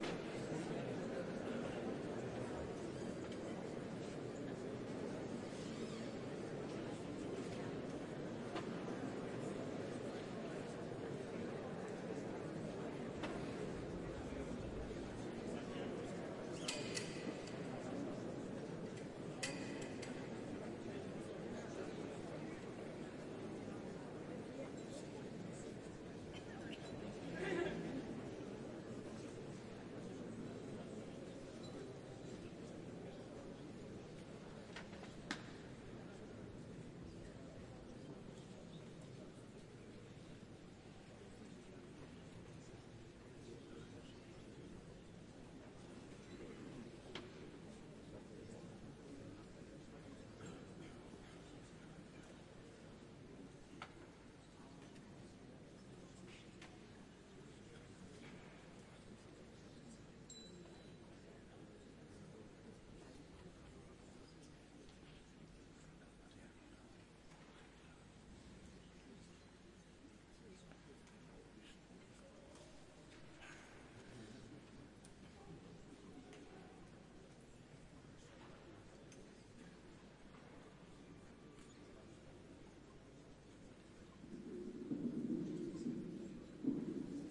cathedral public before concert
Public in a cathedral before a concert, slowly getting quieter.
Público en una catedral antes de un concierto, poco a poco va callándose.
Catedral, Cathedral, Concert, Concierto, Murmullo, People, Public, Publico, Whisper